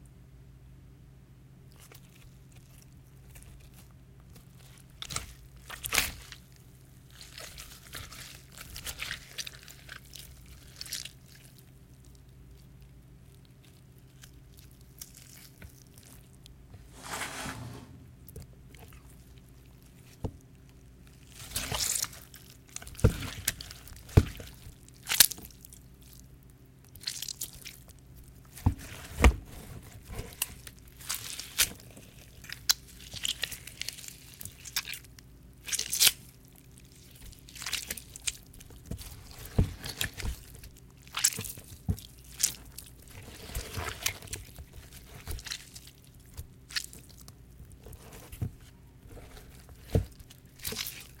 Blood Gush and Squelch
Sounds of blood/flesh being squeezed, gushing and squelching. Created by squeezing watermelon innards.